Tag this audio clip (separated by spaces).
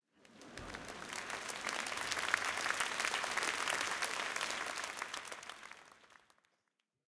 applause crowd theatre